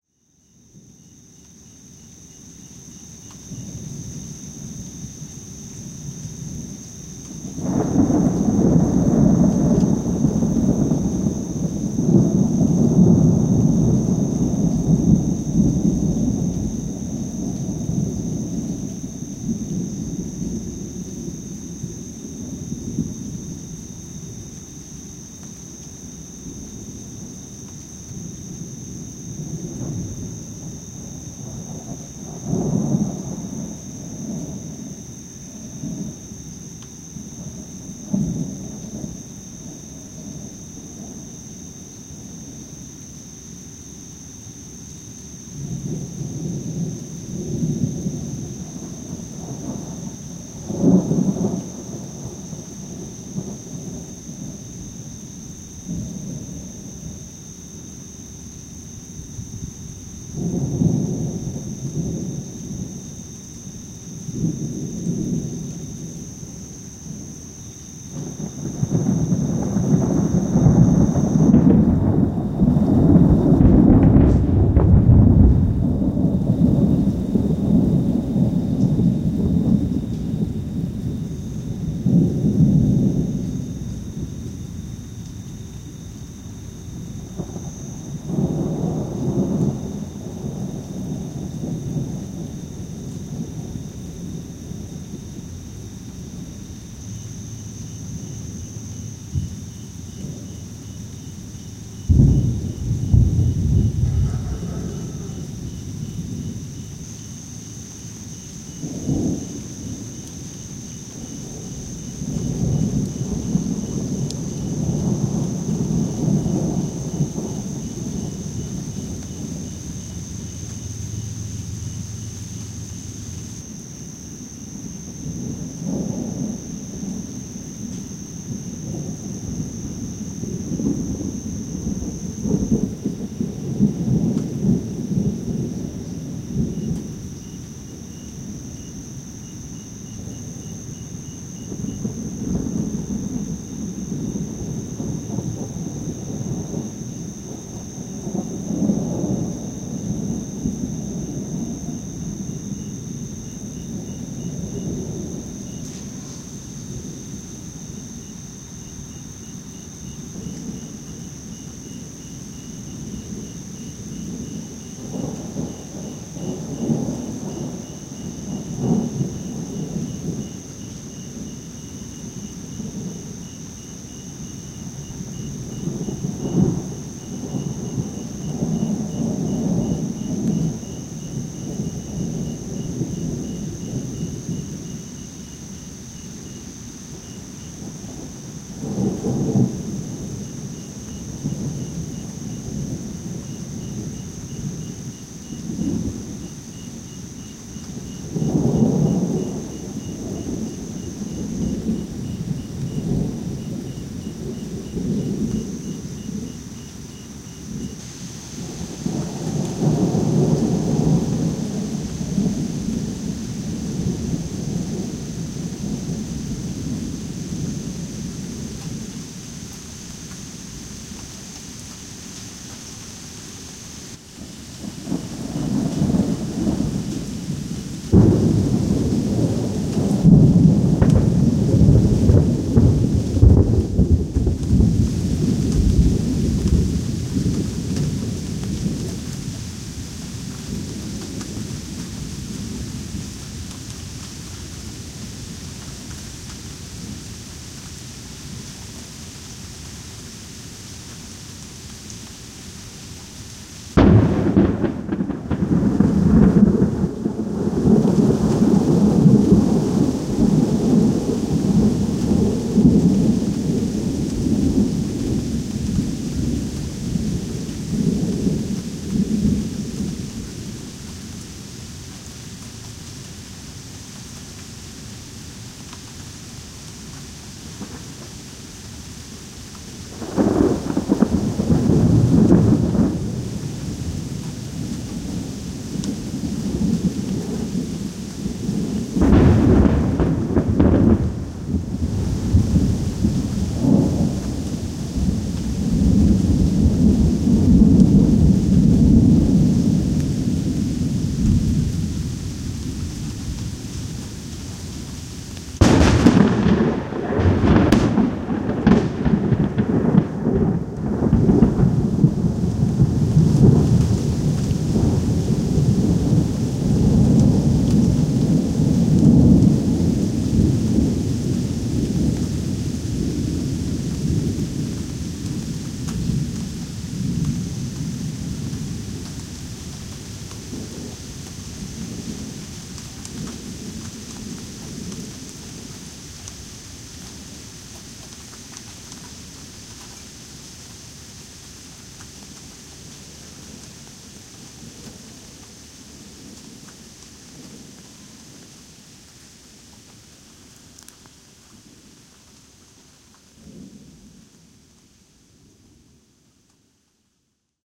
Amazing acoustics with the thunderstorm that rolled through Lincoln, Nebraska last evening.